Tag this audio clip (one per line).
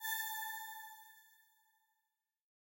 audio
beat
effext
fx
game
jungle
pc
sfx
sound
vicces